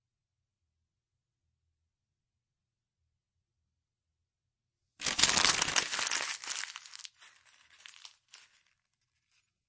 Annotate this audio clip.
a piece of paper (lined in blue ink) is balled up